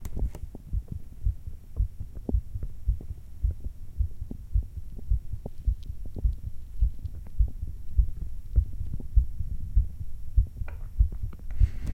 180081 Heart Beat 01
A heart beat recorded with a ZOOM H6 by using a stethoscope.
unfit, Heart, Stethoscope, Fast, OWI